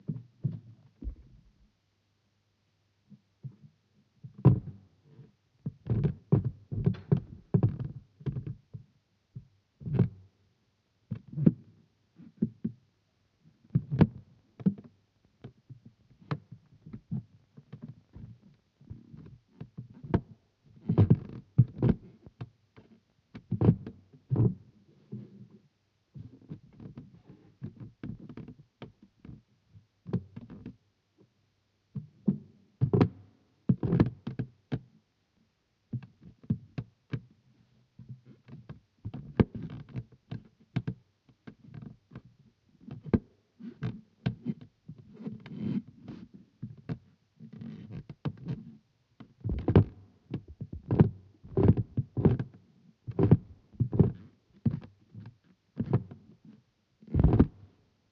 Contact Stair Creak 1
Creaks and groans. Muffled with a bit of crunch from microphones
Microphone: 2 x cDucer contact mic's in stereo
Wood, France, Noirlac-Abbey, Muffled, Groans